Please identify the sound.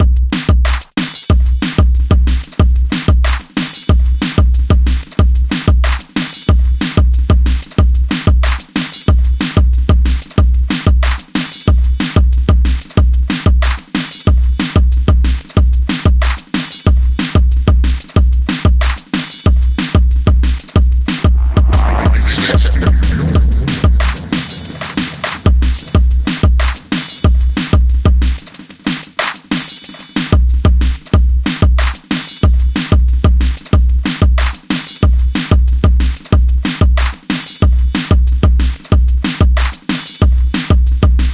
185 Drum n Bass
Layered Drumsounds and Tonal. Build with Polyplex (Reaktor) Shaped and Compressed
Created in Music Studio
Bass, Dnb, Drum, Drums, Loop, n